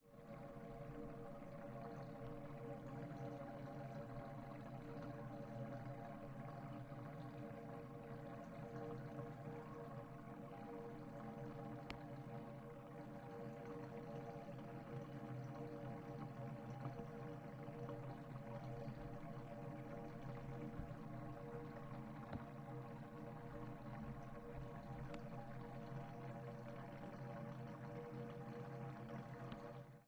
Bathtub Emptying- Underwater
The recording of a bath emptying from under the water. Recorded by putting a behringer c2 in a sandwich bag and taping it up, placed over the plug hole. Recorded using a behringer c2 through an m-audio projectmix i/o